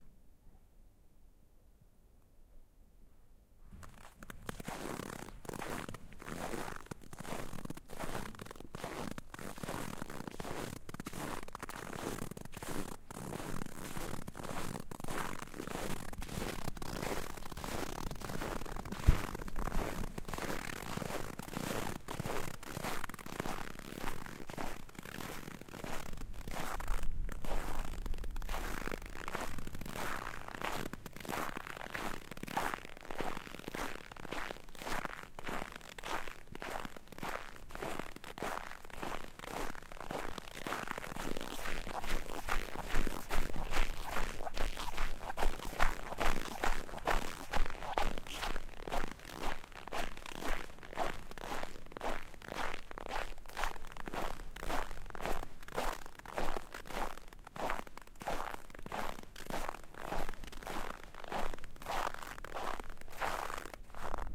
Snow Walking Quiet
Walking quietly through well packed snow. The snow was on a plowed, unpaved road in Tabernash, Colorado. I was wearing size 10 Toms canvas shoes. The recording was done on an H6 with the standard XY capsule.